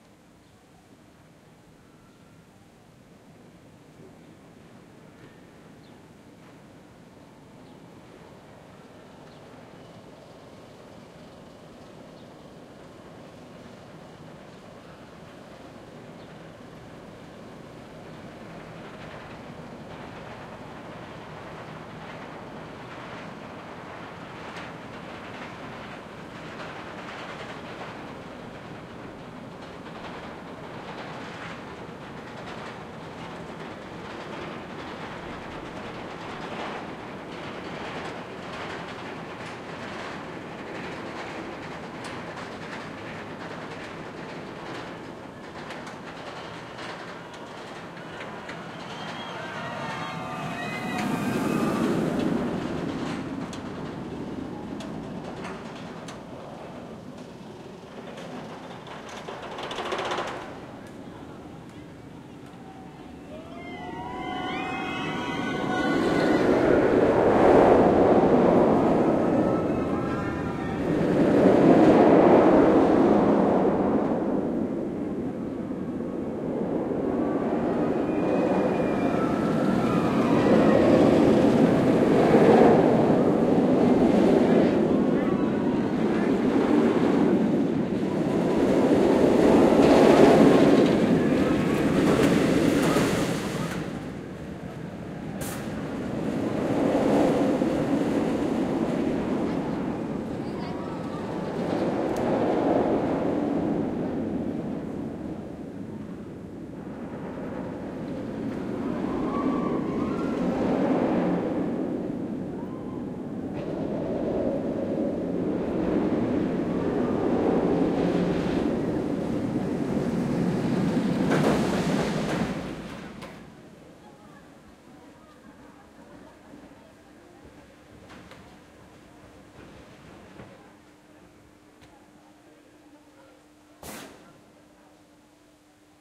The sounds of a big steel roller coaster.